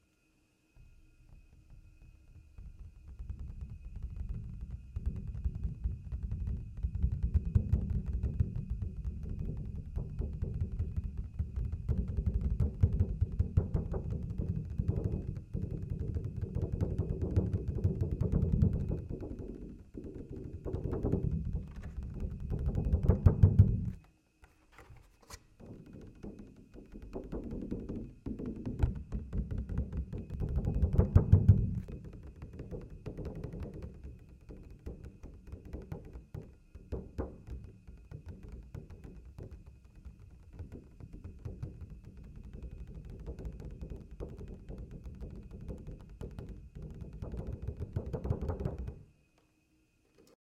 Tray Lid Rumbling
plastic, replicate, rumbling, Tapping, tray